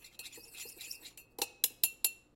Ceramic coffee cup and metal spoon
spoon, cup, coffee